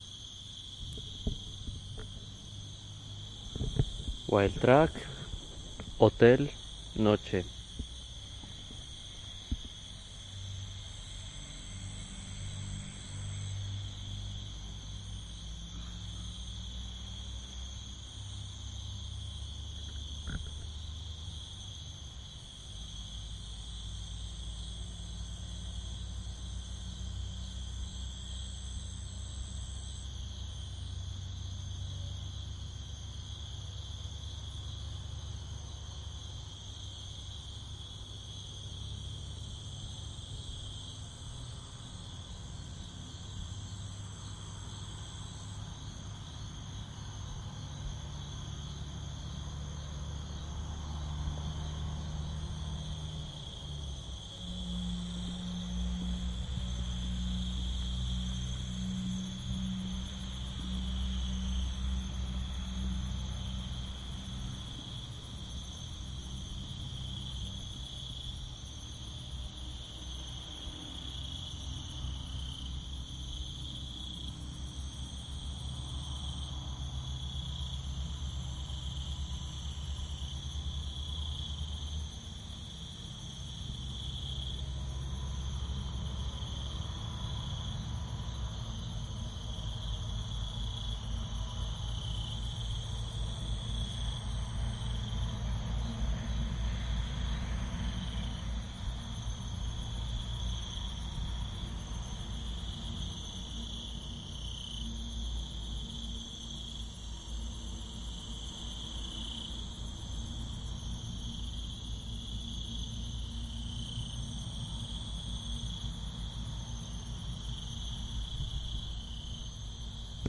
Wildtrack of crickets at night in the back of an hotel in Meta - Colombia in a very warm night with a Higway passing 200 meters away. Recorded with Zoom H6 - Sorry for the bumps, you can fix them with a HP filter.